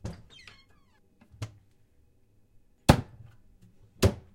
A sound of a door of a locker in the kitchen.